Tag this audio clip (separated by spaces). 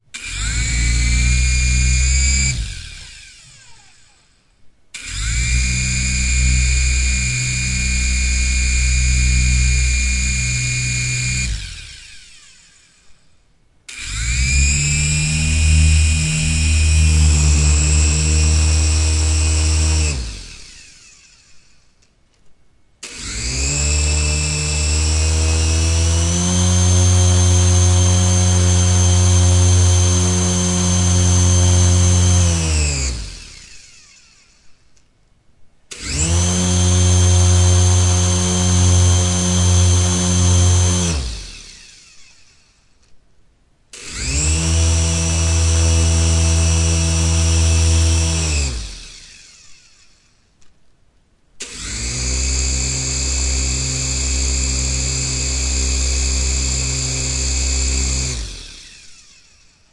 mechanism motor robot flight copter flying mechanical stereo remote-controlled movement cyborg mechanic helicopter heli quadcopter engine hydraulic play constant plaything vehicle drone robotic